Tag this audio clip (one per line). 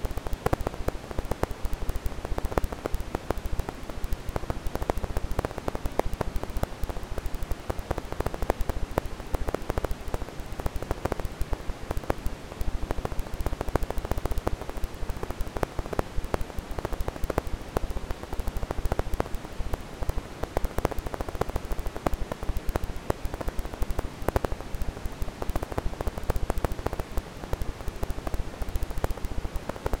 crackle
hiss
record
rpm
vinyl
warp
wear